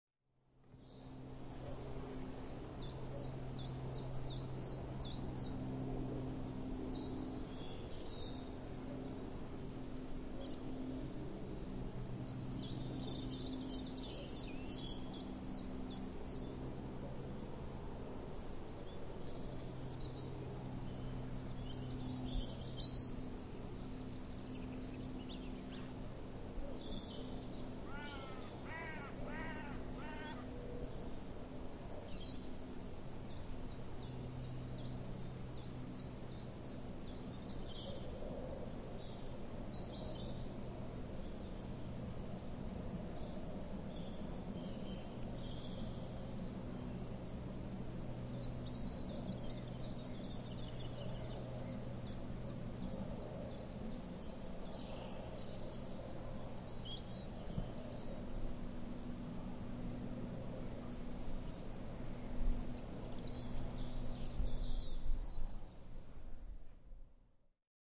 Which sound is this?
Sk310308 2 chiffchaff
A spring day in late March 2008 at Skipwith Common, Yorkshire, England. The sounds of several birds including an early chiffchaff and a crow can be heard. There are also general woodland sounds including a breeze in the trees, aeroplanes, and distant traffic.